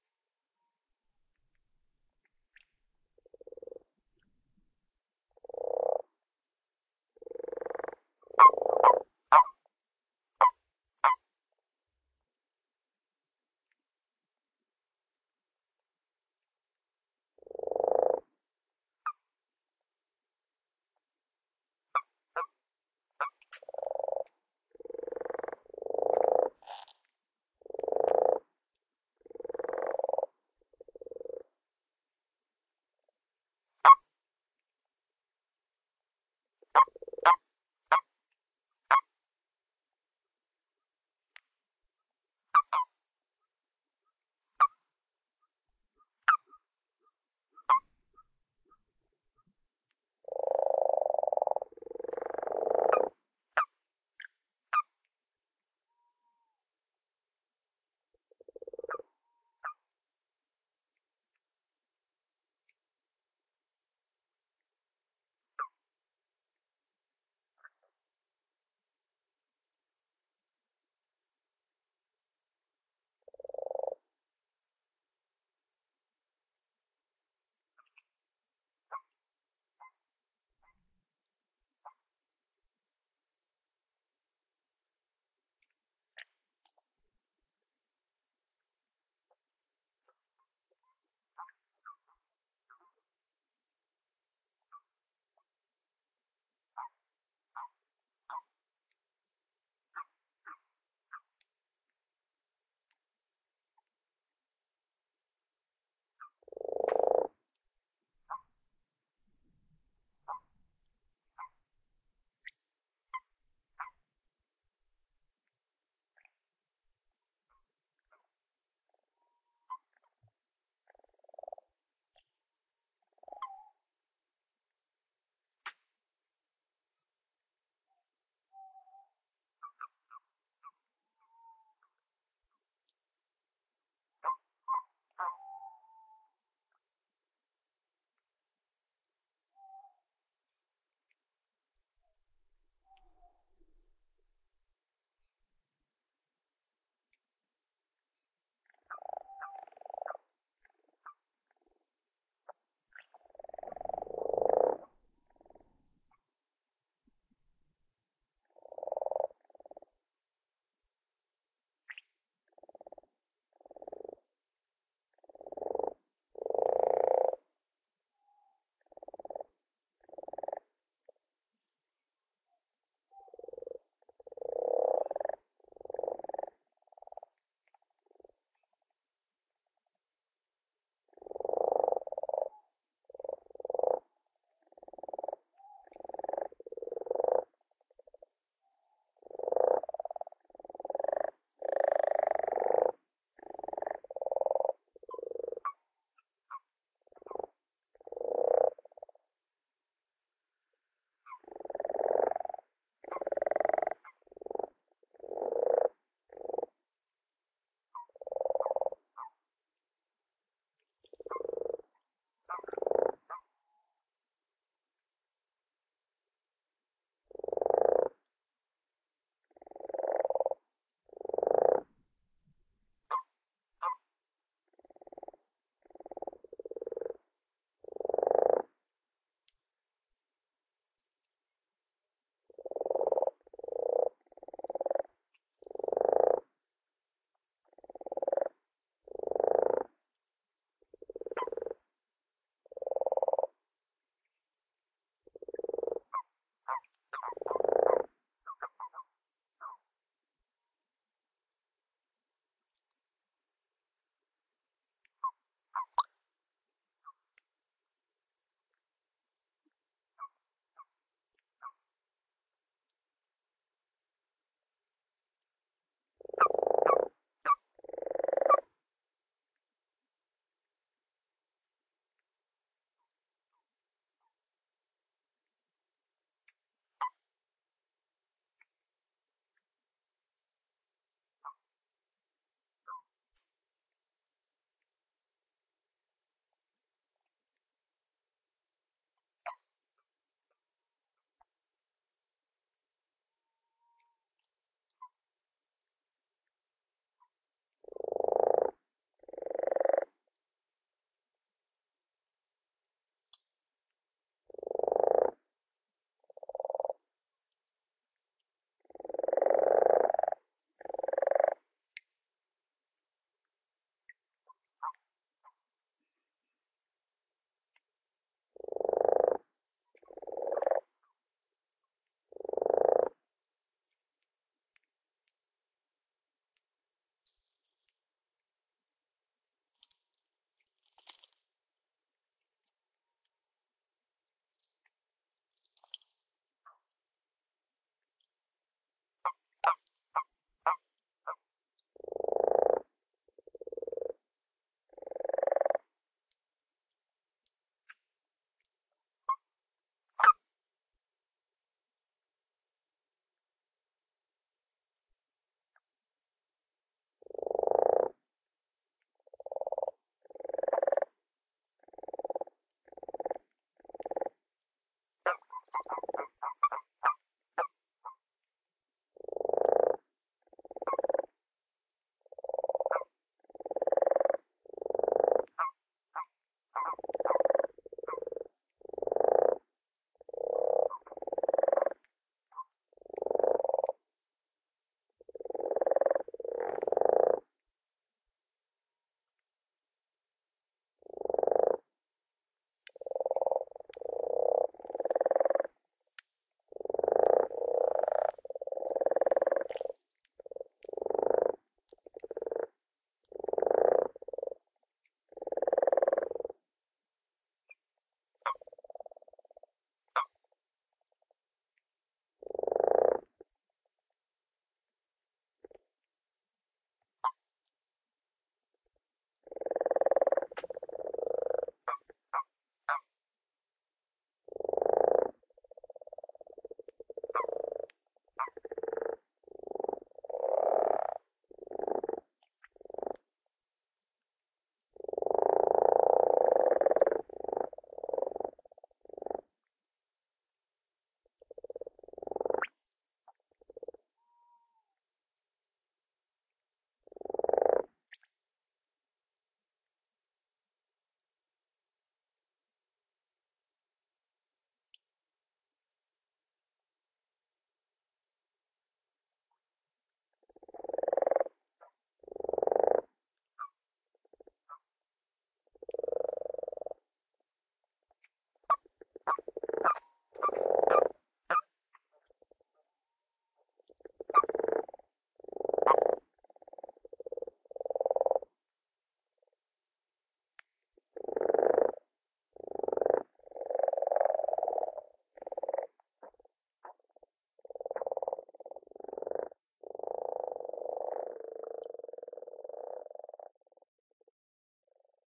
Frogs And Toads
A stereo field-recording of frogs (Rana temporaria) and toads (Bufo bufo) in a pond at the mating season. The lower pitched croaks are probably all frogs. The higher pitched qwarks are male toads (the females are mute) which have been grabbed by a frog or another male toad.Two Tawny Owls are also heard in the distance. Rode NT4 > FEL battery pre-amp > Zoom H2 line in.
field-recording, stereo, water, rana-temporaria, xy, toads, frogs, croak, bufo-bufo, qwark, splash, plop